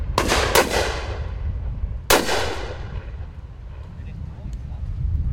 Close range shots with wind1
Sounds of shots taken from side-by-side shooter during a Pheasant shoot in very windy conditions.
discharge, side-by-side, shooting, fire, shot, shotgun, season, firing, shoot, windy, gun, gunshot, pheasants, bang, over-and-under